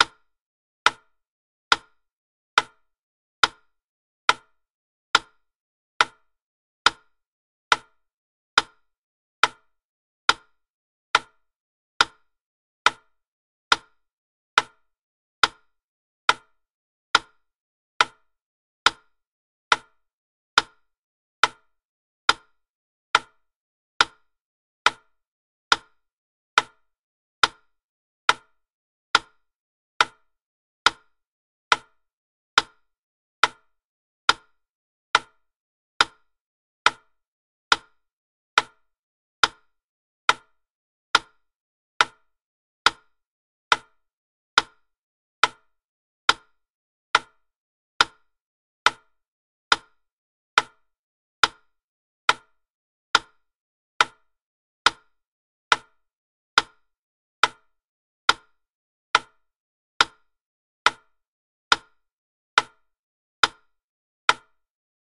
Wittner 70 BPM
Wooden Wittner metronome at 70 BPM, approx 1 minute duration.
70-bpm, tick-tock, wittner-metronome